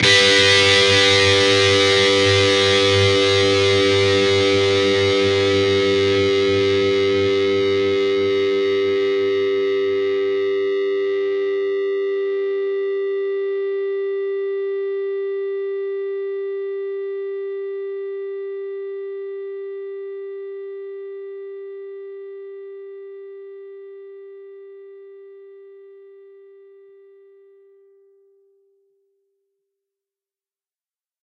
Dist Chr G&B strs 12th up
Actually a Gmj 2 string chord. Fretted 12th fret on both the D (4th) string and the G (3rd) string. Up strum.
lead-guitar, distorted-guitar